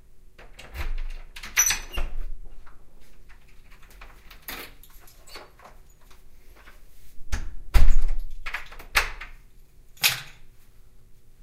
Stereo binaural recording: unlocking the front door, coming inside, closing the door and tossing the keys in a basket.
door, front, close, unlock, keys, open